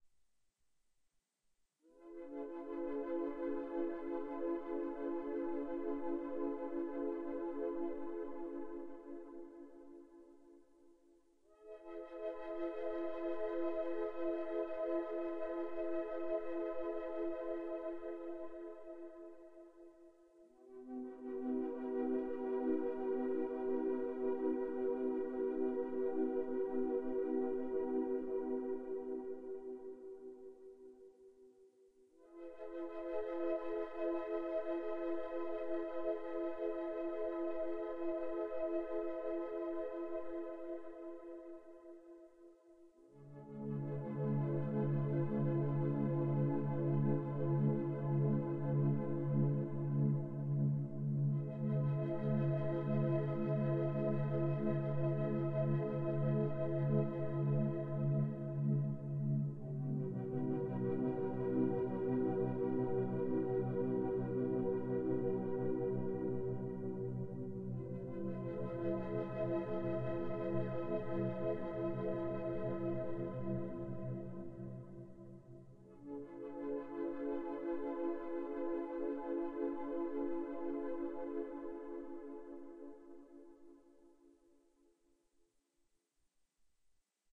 sci-fi drone ambience #2
Drone ambience music created for various purposes created by using a synthesizer and recorded with Magix studio.
drone, electro, sci-fi, synth, electronic